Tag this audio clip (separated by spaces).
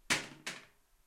restroom toilet toilet-seat washroom bathroom